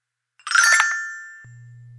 old timey magic
manipulated sounds of a fisher price xylophone - resonant filter audio Technica mic